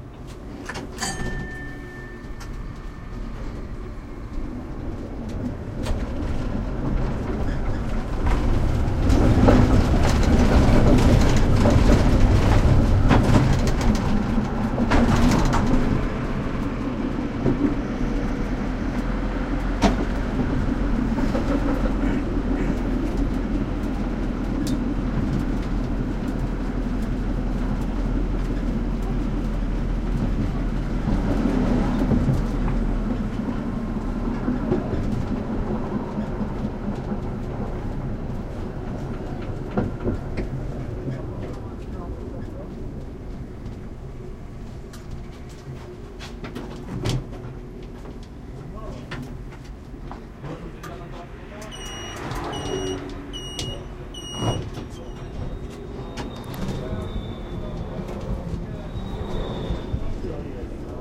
polish tram 105N
inside, polish, streetcar, tram, 105N